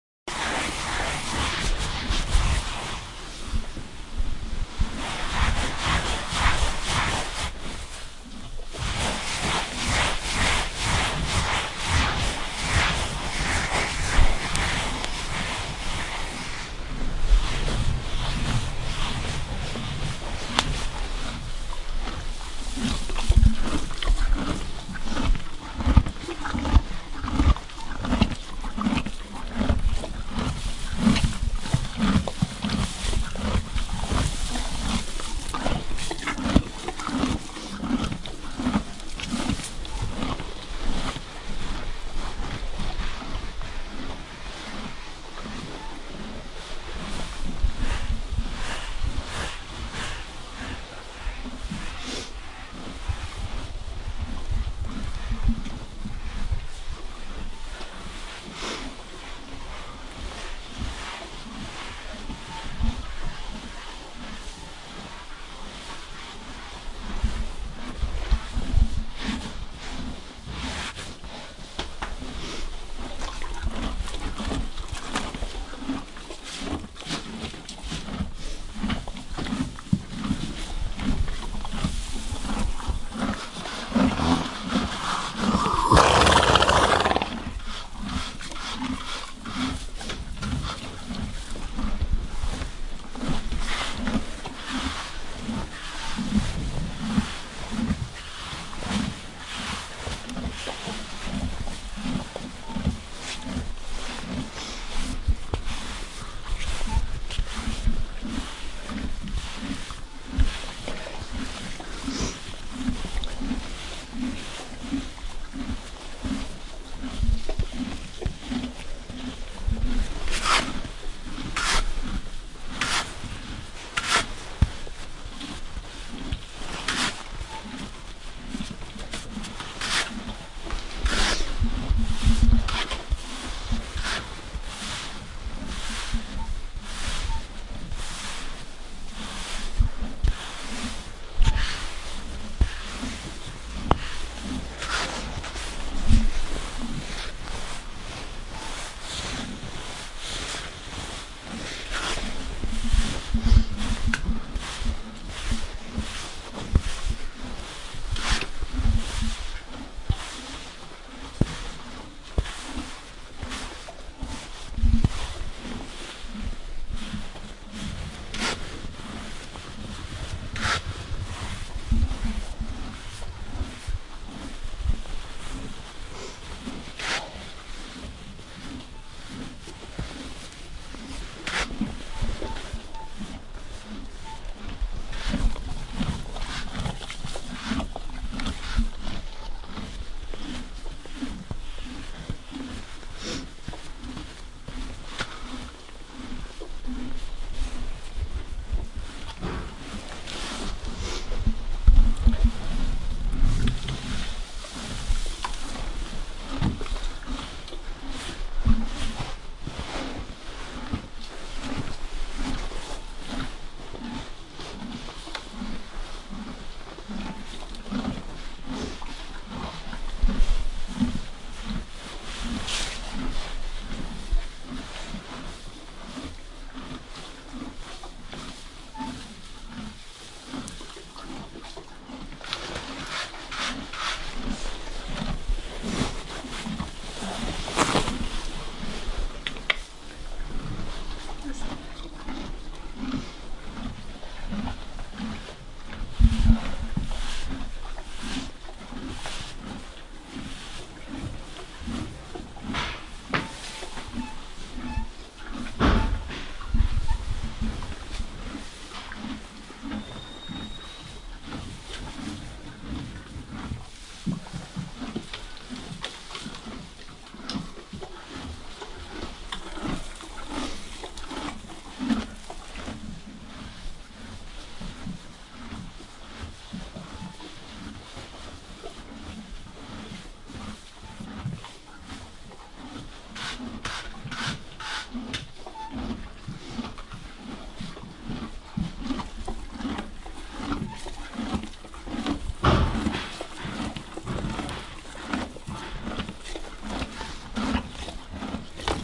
Horse being brushed from close. She is eating.
barn, brushing, caring, chewing, eating, equestrian, farm, feed, hay, horse, horses, stable